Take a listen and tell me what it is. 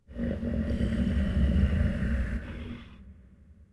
Gruñido de Rata-Humano (Monster/Mutant). Zhile Videogame.
dientes, mordida, Gru, saliva, bite, ido